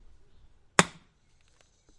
chopping magazine
The sound of a small garden axe swung into a magazine on top of a stump
recorded with a zoom h6 stereo capsule
axe, magazine, capsule, loud, h6, a, stereo, zoom, low, hard, stump, recorded, OWI, hardsound